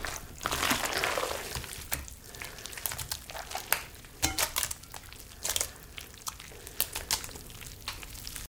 Pumpmkin Guts Squish 4
Pumpkin Guts Squish
guts, squish, pumpkin